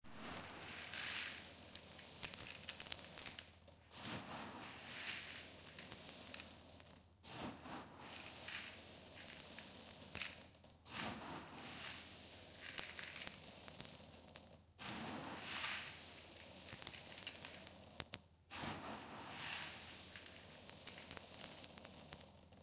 Coffee Beans
Series of coffee sound effects. Pouring beans, going through the beans with the hand, etc.
cafe, beans